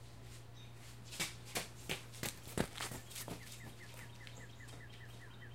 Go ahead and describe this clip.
Fast footsteps from side to side in the stereo field.
footsteps, running, steps